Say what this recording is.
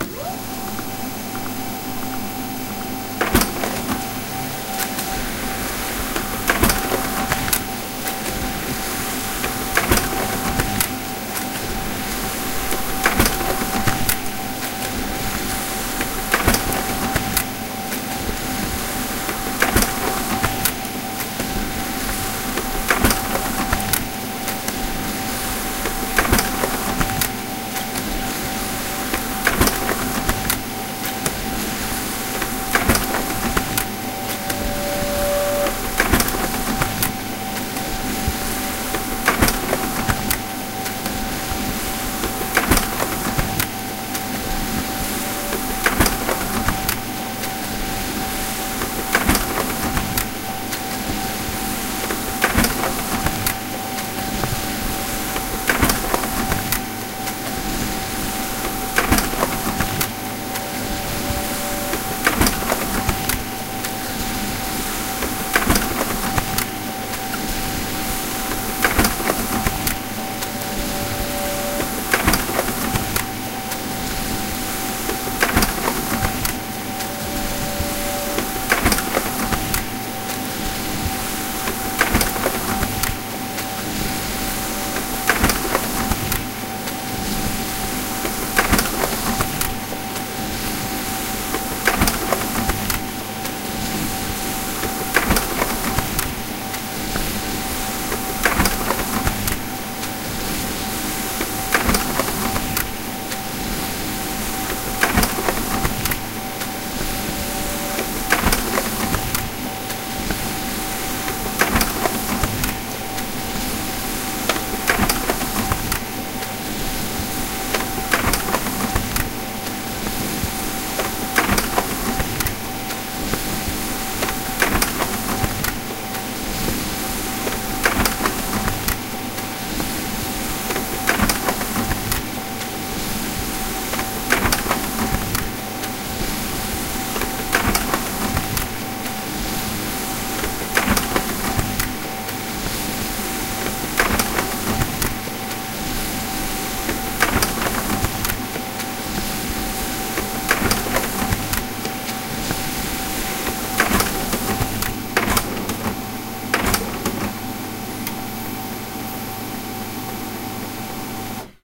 Printer - Laser
Laser jet printer: Print multiple pages.
print, machine, laser-jet-printer, laser-jet